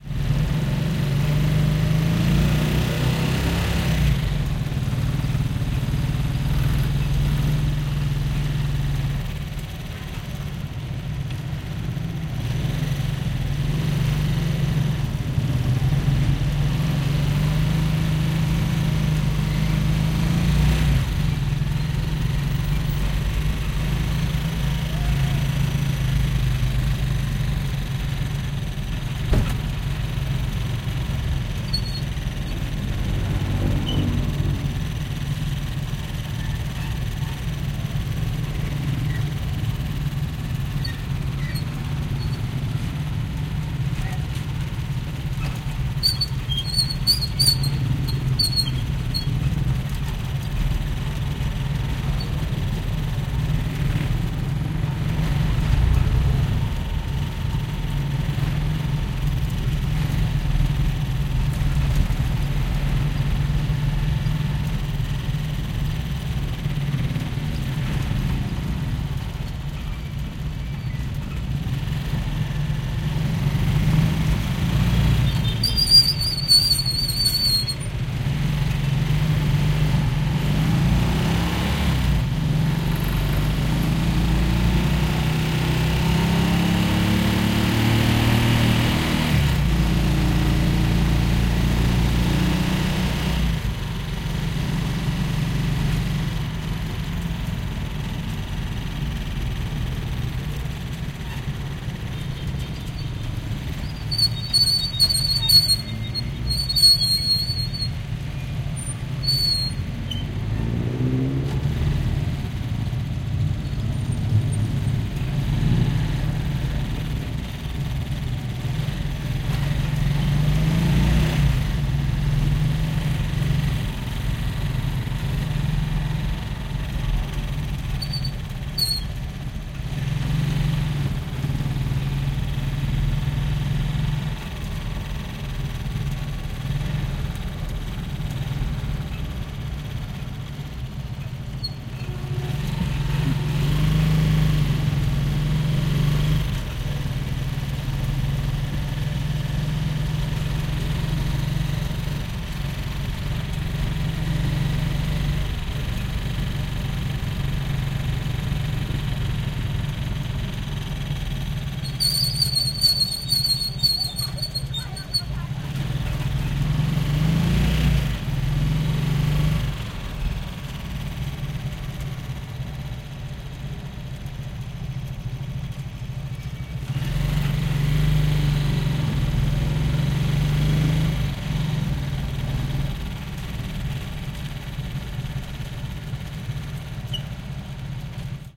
LS 34218 PH Tricycle
Ride a tricycle in the small streets of Manila, Philippines. (binaural, please use headset for 3D effect)
I made this recording while riding a tricycle for a short trip in the smalls streets of Manila, Philippines.
A tricycle is a cheap transportation usually used by many Filipino people for short trips. It consists in a small motorbike with a side-car.
Here, you’re in the side-car. You can hear the engine of the tricycle, and sounds coming from the surrounding.
Recorded in January 2019 with an Olympus LS-3 and Soundman OKM I binaural microphones (version 2018).
Fade in/out and high pass filter at 80Hz -6dB/oct applied in Audacity.
accelerate, accelerating, acceleration, ambience, atmosphere, binaural, city, engine, field-recording, Manila, motorcycle, Philippines, ride, soundscape, street, town, travel, tricycle, trip, vehicles